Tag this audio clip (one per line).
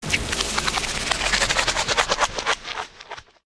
scratching
writing
draw
pencil
magic
scribbling
write
paper
scratch
pen
scribble
granualizer
marker
drawing